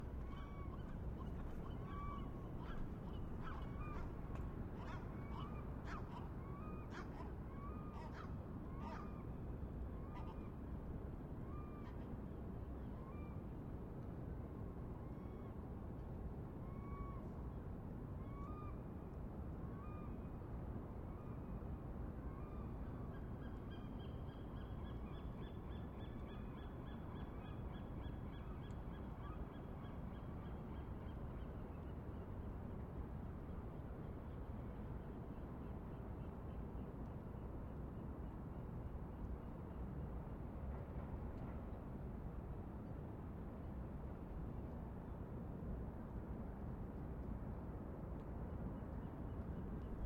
Morning Docks
Recording of the docks of San Diego. Recorded around 5am to avoid sound infections. Sound devices 633/Sennheiser MKH 416. Enjoy.